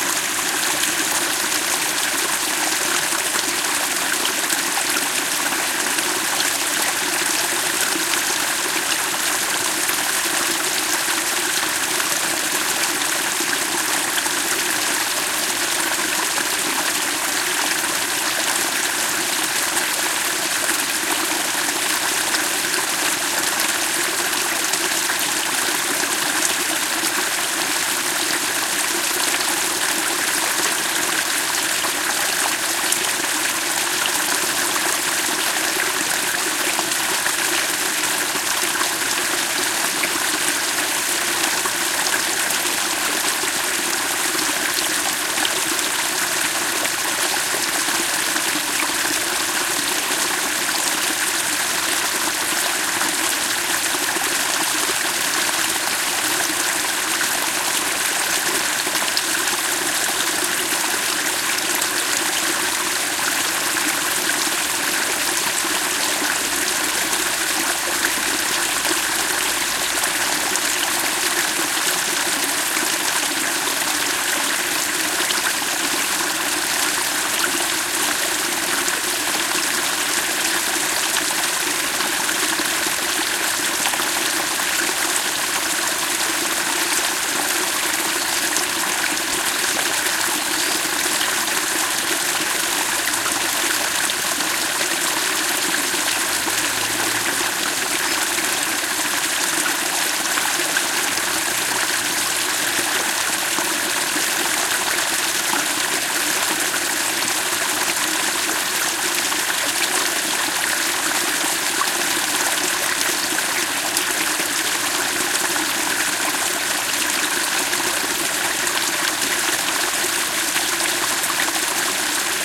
Close take of a water stream in the mountains: no birds, no wind, no other sound. EM172 Matched Stereo Pair (Clippy XLR, by FEL Communications Ltd) into Sound Devices Mixpre-3. Recorded near Vallespinoso de Aguilar, Palencia Province, N Spain
field-recording, nature, river, stream, water